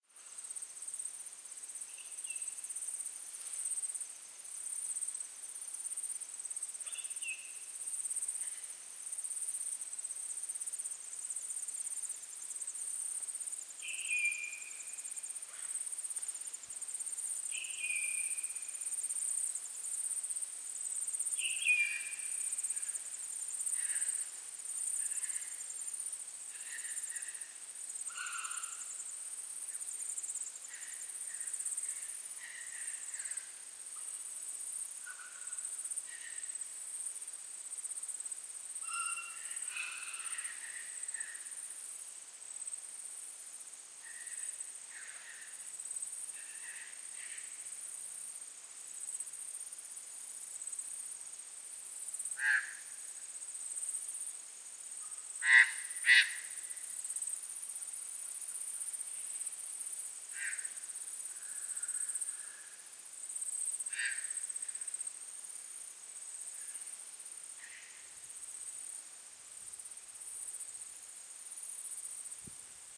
Morning at Kosciuszko National Park
Good morning from Kosciuszko National Park, New South Wales, Australia. Recorded as the sun was rising.
field-recording, sunrise, nature, australia, morning, birds